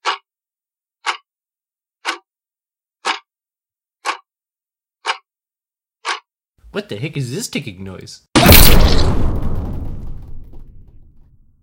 I Recored My New Analog Clock That Was Gifted From My Mom.
Recored On A Shure MV88+
analog-clock, bomb, clock, tic, tick, ticking, ticks, tick-tock, time, tock